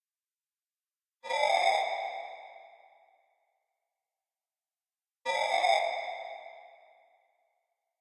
Metallic Chaos Noise (120bpm)
A part of the Metallic Chaos loop.
MachineDroid, Robot, Metallic, Noise, Terminator, loop, Ambient, Industrial, Factory, Metal